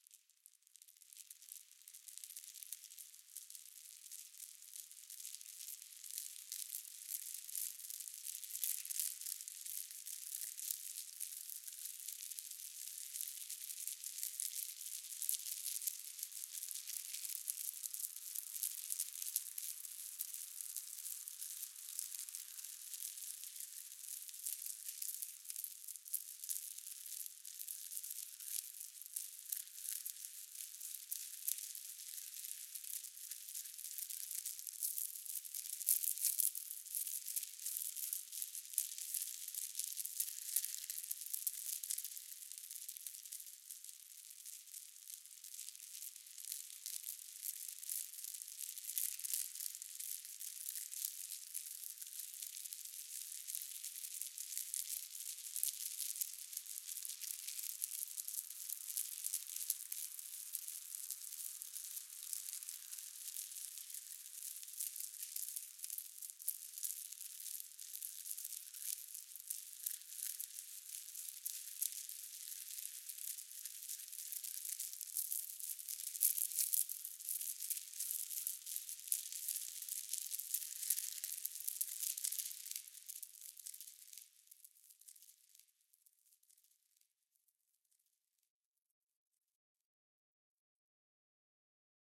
Recorded some marbles rolling and processed the sound with pluggins from Ableton Live 9